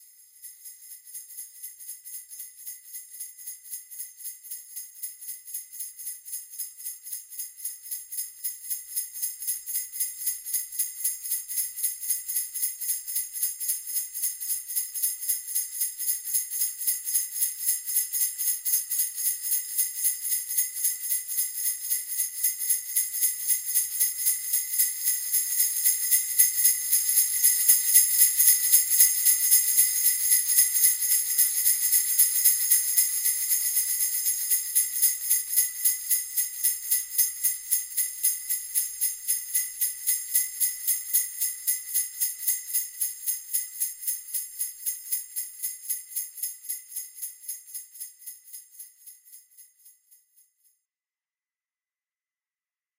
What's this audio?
Hand sleigh bells recorded with spaced DPA4040.
Fade in and out.